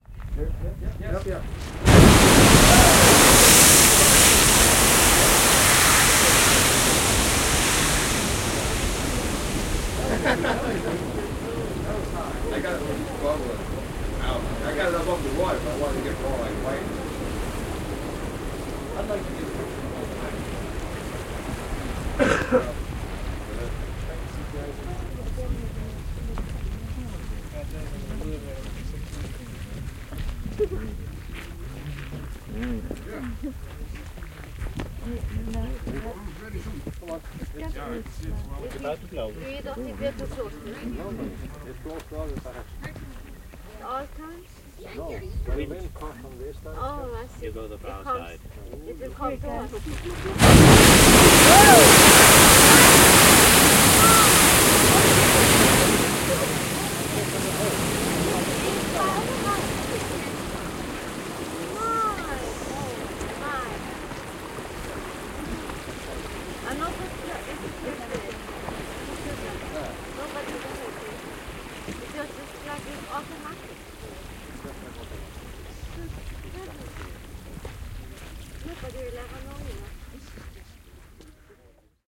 Islanti, geysir purkautuu, turistit / Iceland, geyser erupting twice, tourists reacting, talking, walking
Geysir (kuuma lähde) purkautuu kaksi kertaa. Ihmisten reaktioita purkauksiin, välillä askeleita.
Paikka/Place: Islanti / Iceland
Aika/Date: 1981
Finnish-Broadcasting-Company, Purkautua, Purkaus, Field-Recording, Vesi, Yle, Tehosteet, Soundfx, Yleisradio, Iceland, Eruption, Islanti, Erupt, Water